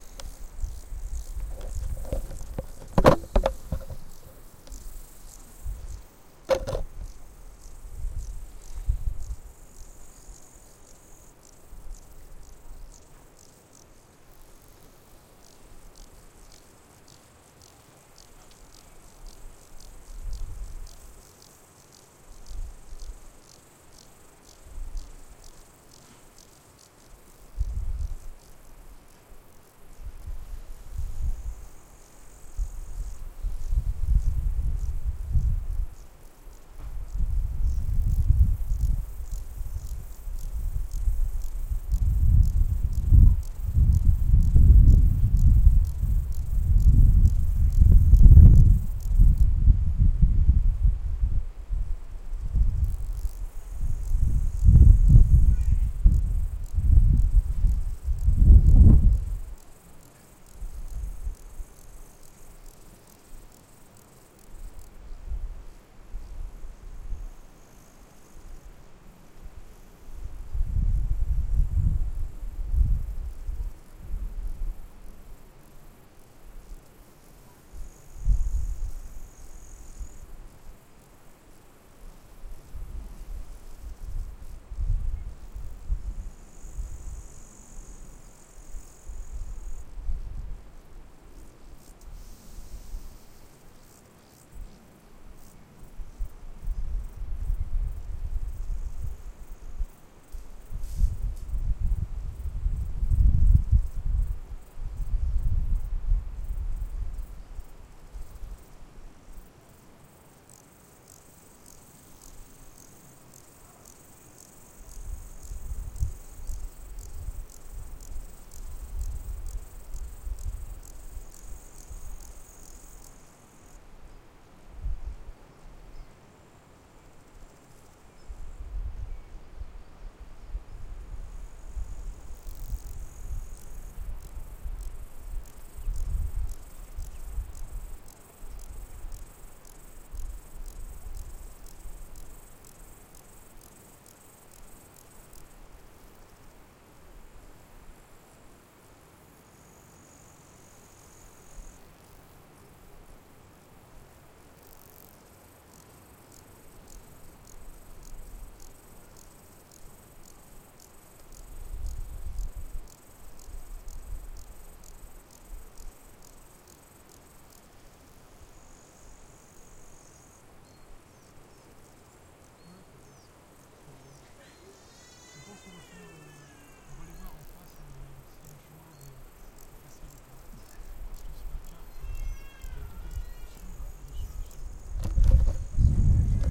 Meadow Alps 2
Recorded in the French Alps on Zoom H2. Features Grasshoppers and birds.
grasshoppers, zoom-h2